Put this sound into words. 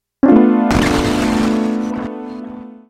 crashing piano chord
Casio CA110 circuit bent and fed into mic input on Mac. Trimmed with Audacity. No effects.